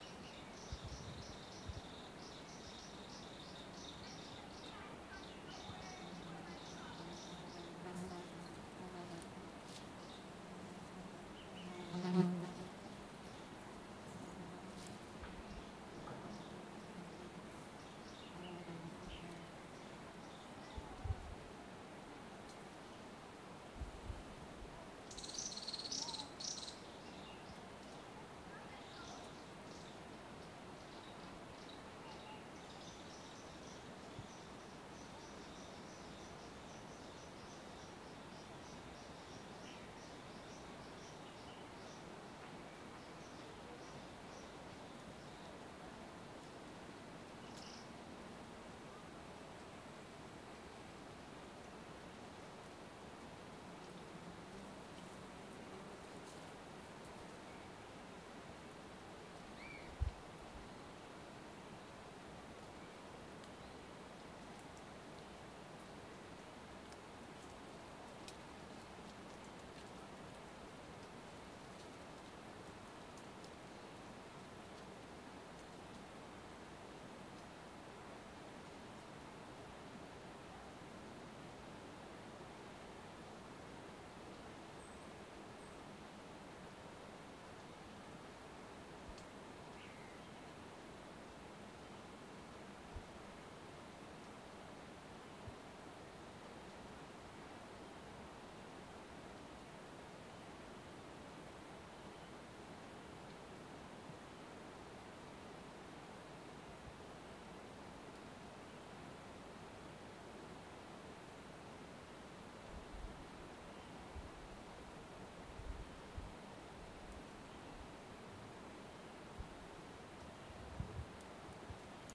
Recording from a forest. There are also human voices from tourists but only in the beginning. No process applied.
ambiance, birds, field-recording, Forest, nature, river, south-Chile, trees, wind